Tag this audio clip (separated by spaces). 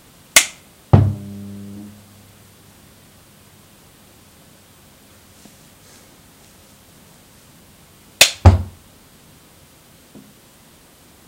power electricity off speakers contact